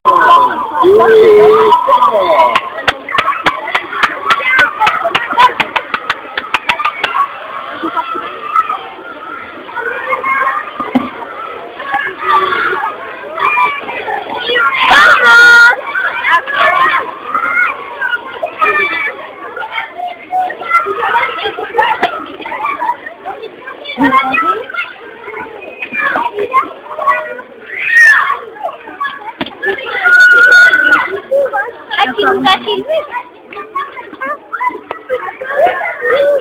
shcool bell Saint-Guinoux
Schoolbell of Saint-Guinoux